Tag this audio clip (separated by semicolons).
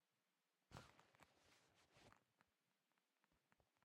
rummage; grab